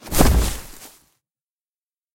goblin-fall
The goblin chief falls off his chair! This is when you win a small game I made: